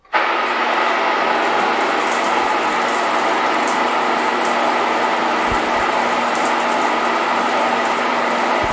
electric power shower / chuveiro elétrico
shower, electric, bath